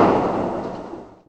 Cut of a firework
explosion, firework